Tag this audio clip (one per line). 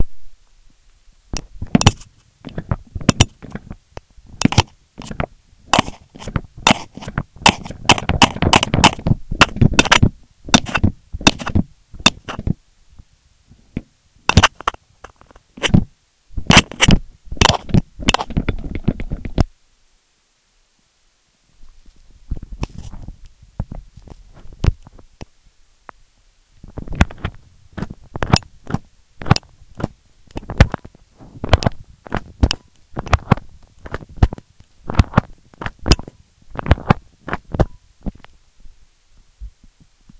perception
contact-mic
ambient